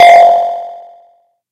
Short modulated oscillations. A computer alerted to unknown operations.Created with a simple Nord Modular patch.
beep, bleep, blip, digital, effect, fm, modular, modulation, nord, robot, sound-design, synth, synthesis